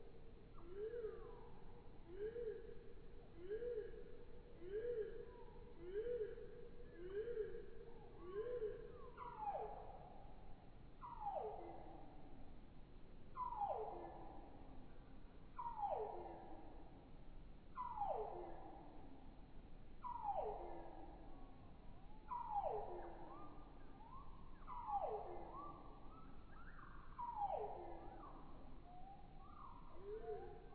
slow cardinal
A cardinal recorded in Durham, slowed to about 1/3 speed (using Sound Studio). Results in a strange, tropical sounding call.
altered, birdsong, cardinal, field-recording, slowed